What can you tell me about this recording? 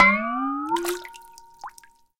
beLL EmErging 01
A large cow bell emerging from water
Hearing is seeing